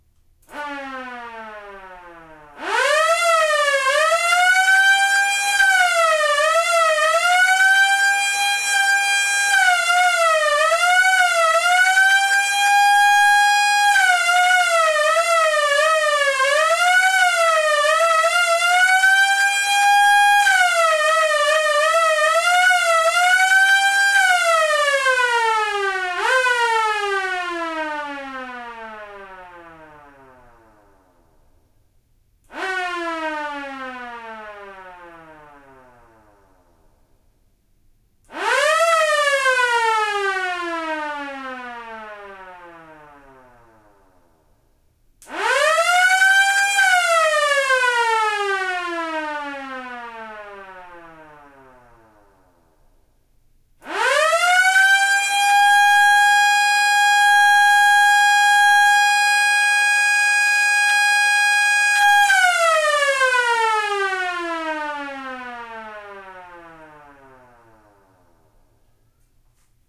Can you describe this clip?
Old Police Siren
Equipment: Tascam DR-03 on-board mics
A good recording of a loud, old-style police siren jury-rigged to run off a car battery. Although this sample has an garage-y sound to it, I hope someone finds it useful.
horn, police, siren, blaring, old, air, loud